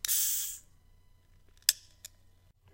The sound of me opening a can of soda.